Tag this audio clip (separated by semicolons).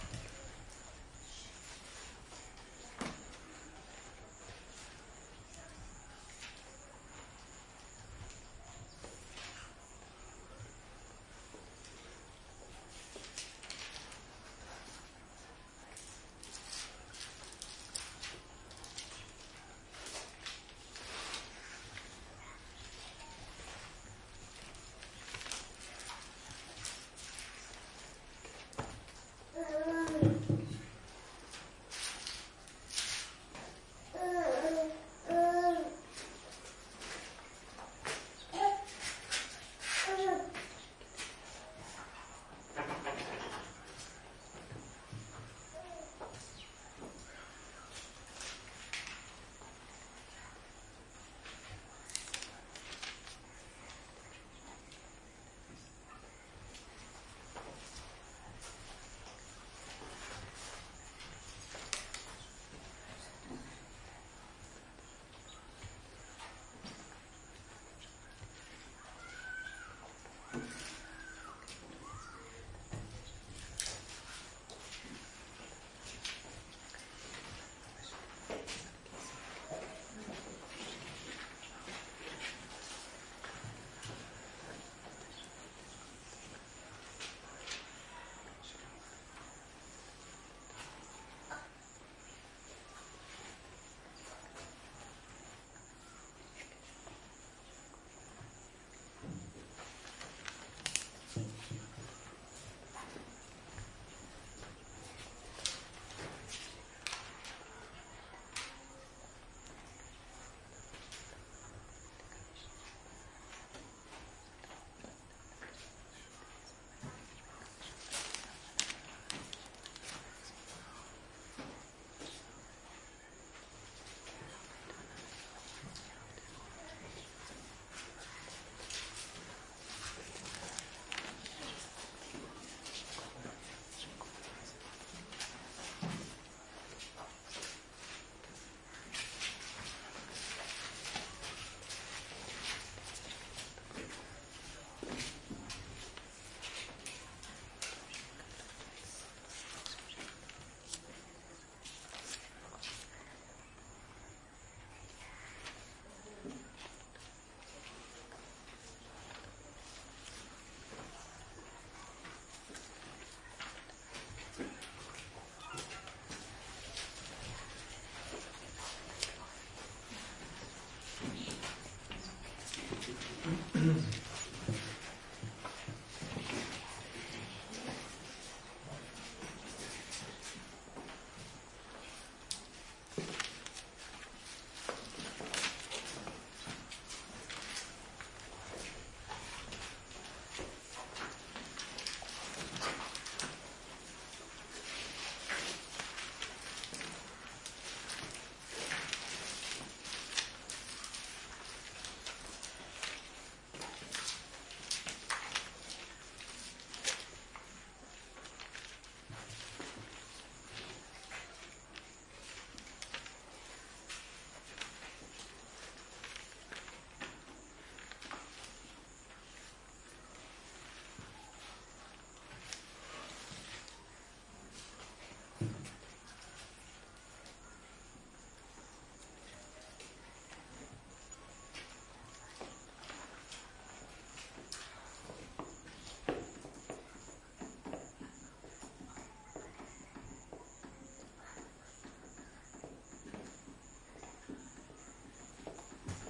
room; bg; studying; village; from; door; people; noise; MS; Uganda; Putti; whispering; distant; small; hut; open; paper; classroom